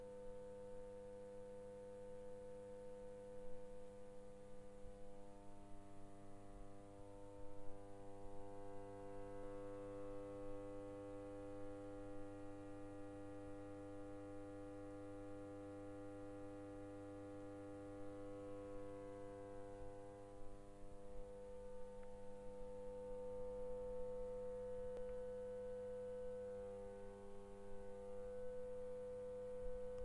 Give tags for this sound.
Power
Distribution
Large

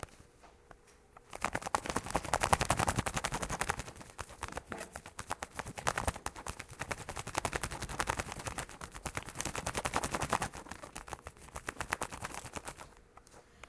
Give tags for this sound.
Can
object18

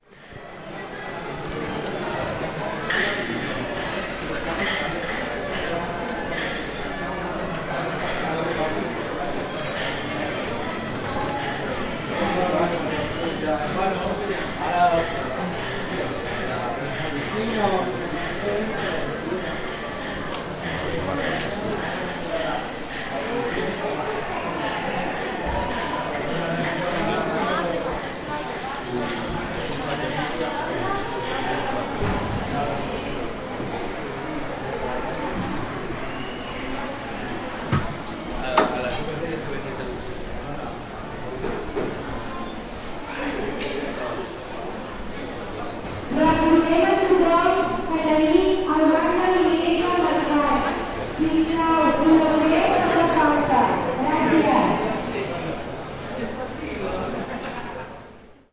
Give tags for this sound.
market
marketsquare
soundccape
sonsstandreu
recording
people
ambient
field

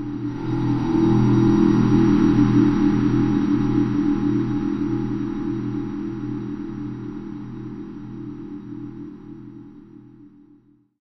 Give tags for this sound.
ambient
deep-space
drone

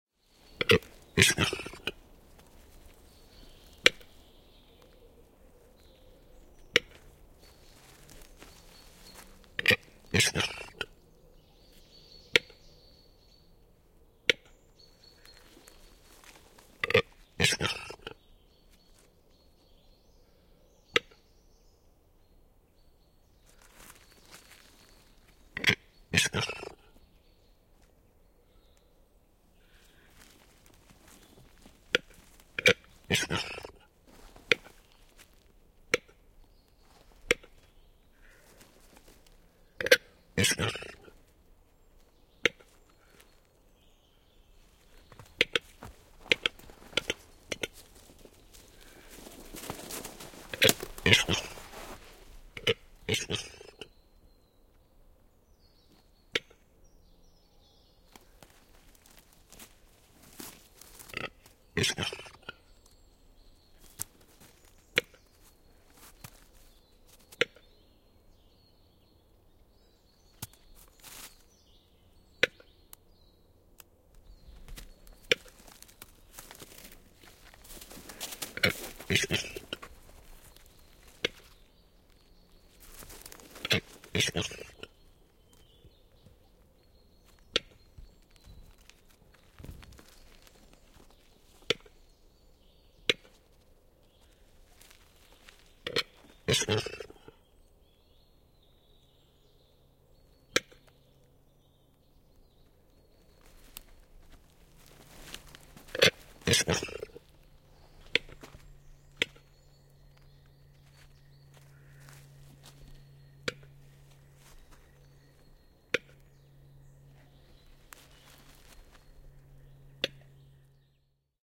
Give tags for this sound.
Linnut
Finnish-Broadcasting-Company
Luonto
Yle
Nature
Yleisradio
Display
Suomi
Capercailzie
Spring
Metso
Tehosteet
Lintu
Capercaillie
Field-Recording
Birds
Bird
Finland
Soundfx
Soidin

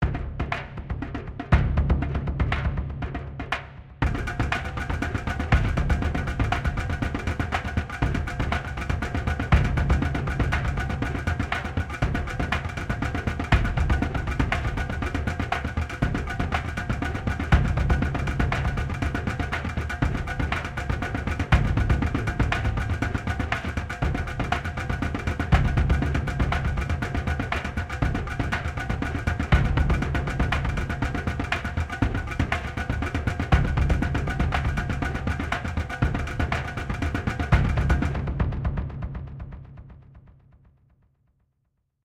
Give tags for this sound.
drum-loop
loop
orchestra
percussion
percussion-loop
rhythm

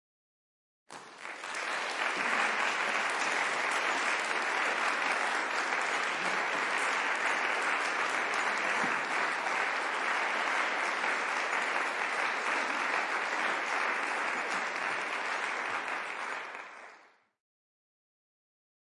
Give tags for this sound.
applauding
cheering
clapping

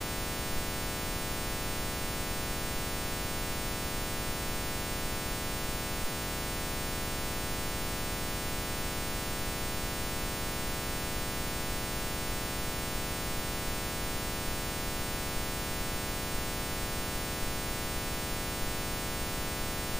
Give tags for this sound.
loop
sequence
space

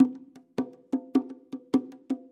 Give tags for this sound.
percussion
loop

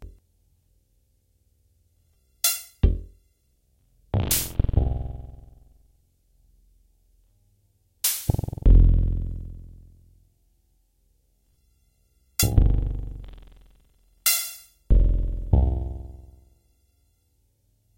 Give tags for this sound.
rare
experimental
modular
pd
ambient
puredata
idm
analog

